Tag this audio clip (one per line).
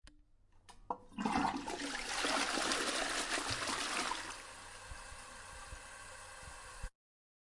bathroom
flush
restroom